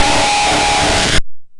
Another industrial noise.
distorted, industrial, noise